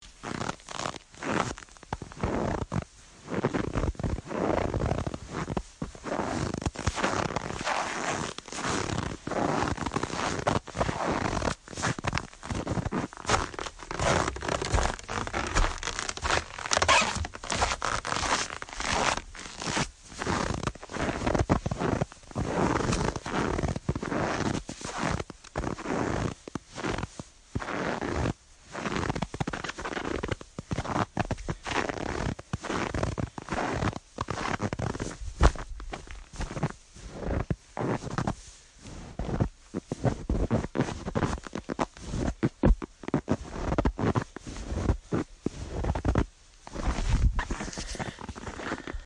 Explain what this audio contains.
Snow footsteps
Man walks through snow and ice, winter cold freezing
cold-snow, footstep, ice, ice-cold, snow, winter